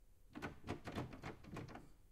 Locked doorknob rattle 2
Insistent testing of a locked doorknob recorded in studio (clean recording)
handle, locked, rattle, shake, test, trapped